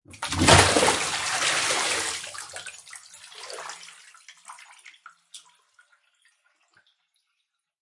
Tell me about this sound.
Water splash, emptying a bucket 2
I was emptying a bucket in a bathroom. Take 2.
bucket, hit